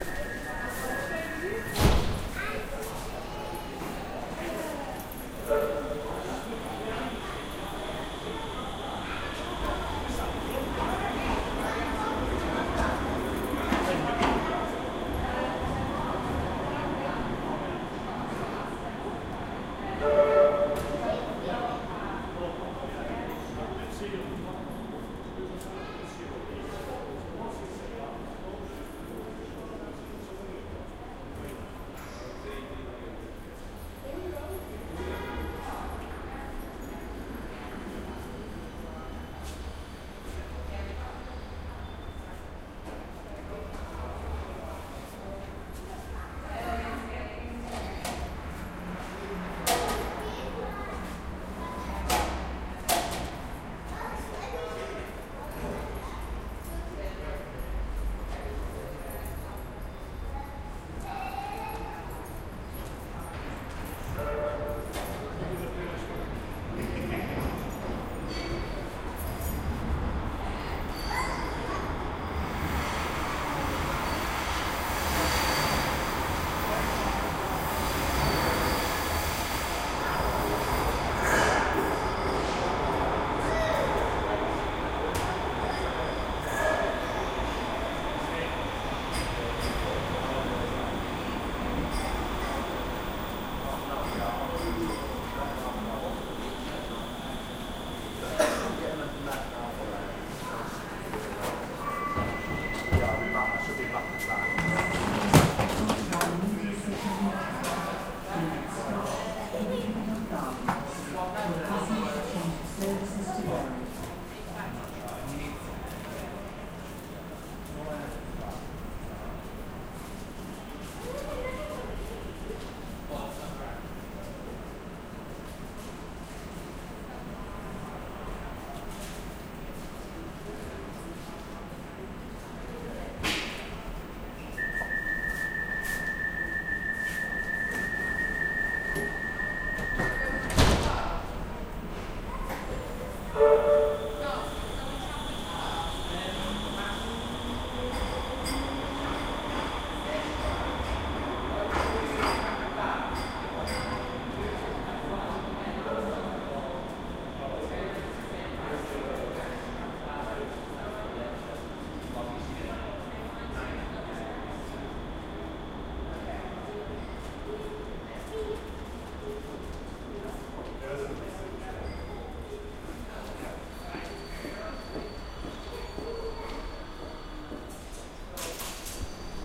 Tram stop - piccadilly
A tram approaches and leaves piccadilly tram stop in Manchester
metro, Tram